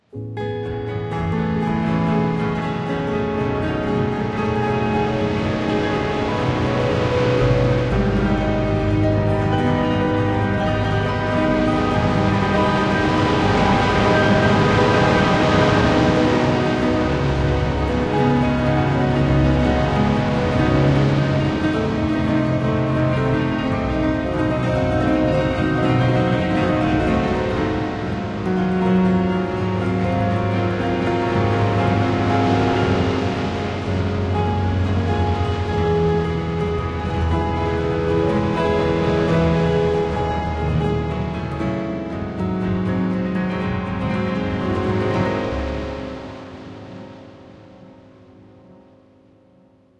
Beach Piano
beach, Cinematic, Film, Keys, Love, Movie, Music, Ocean, Peaceful, Piano, Sea, shore, Sound, Travel, Waves